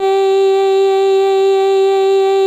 aiaiaiaiaiaie 67 G3 Bcl
vocal formants pitched under Simplesong a macintosh software and using the princess voice
vocal, voice, formants, synthetic